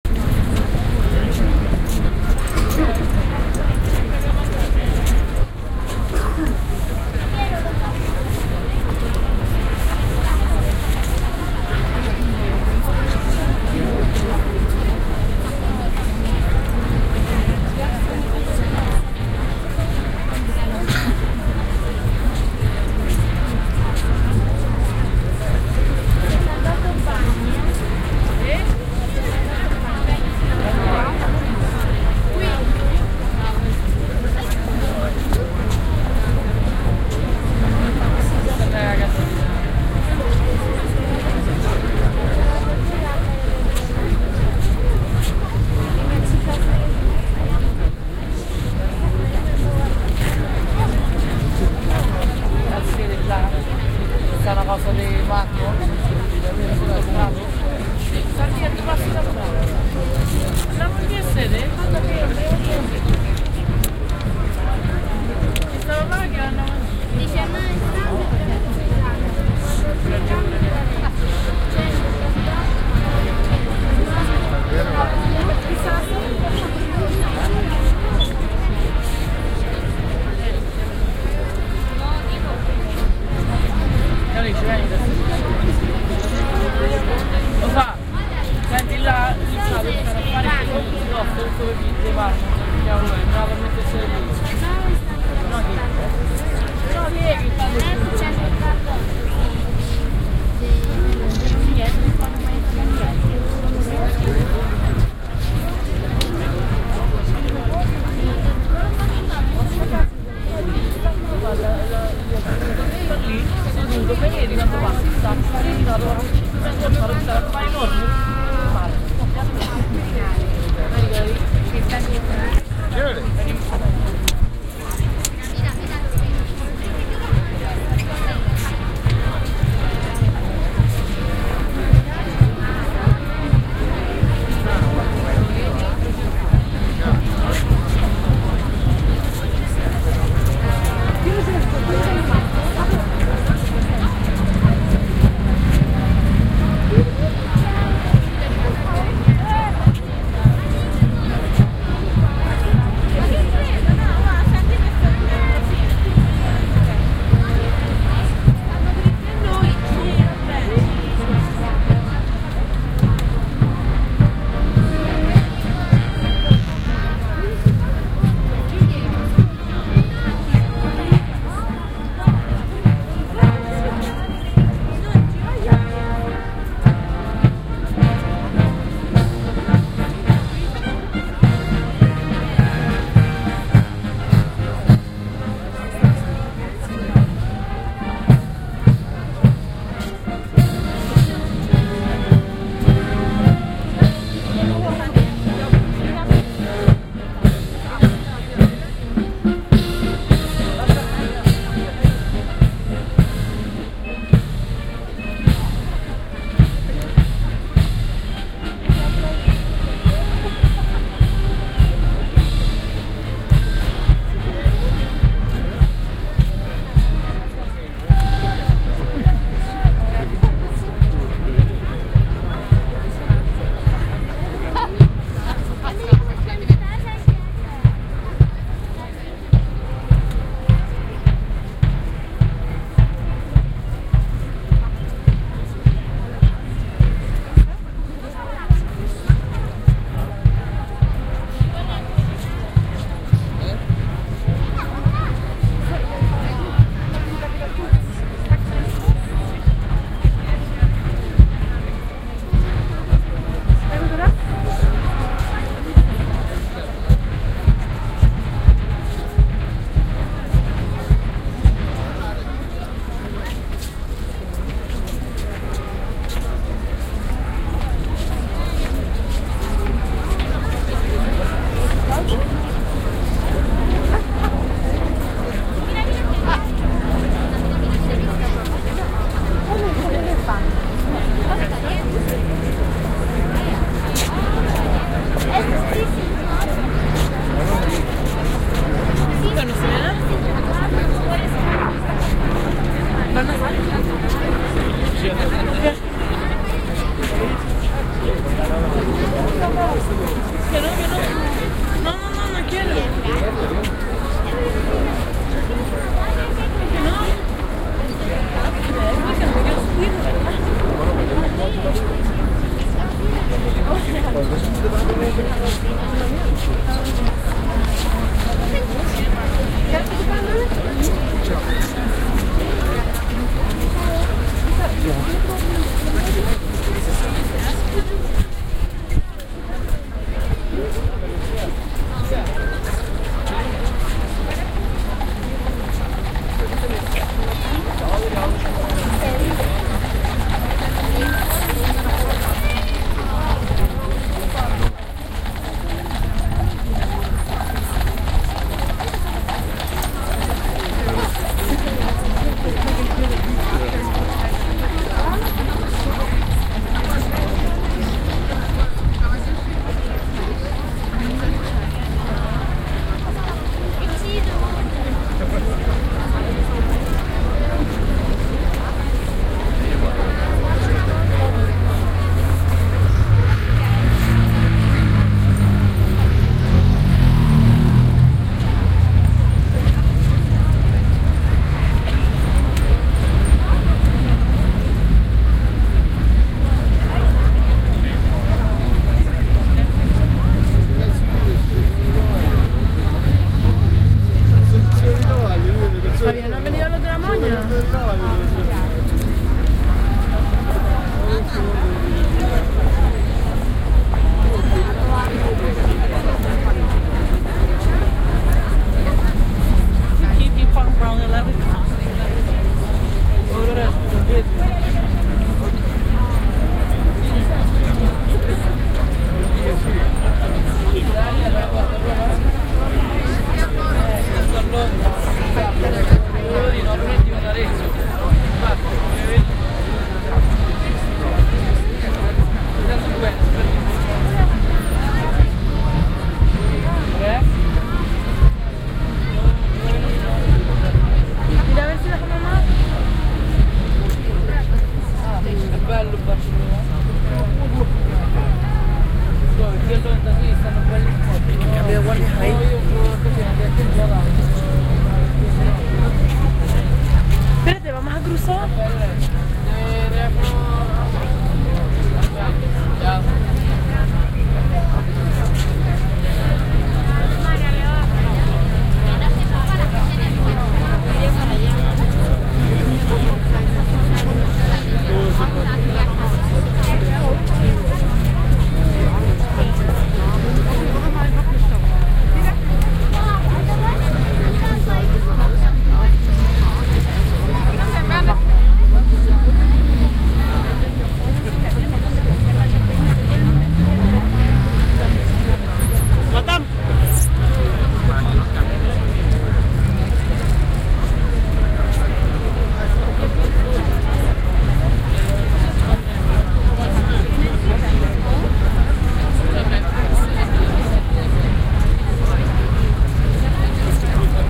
St James Park - Changing of the guard at Buckingham Palace
ambiance, ambience, ambient, atmosphere, background-sound, city, field-recording, general-noise, london, soundscape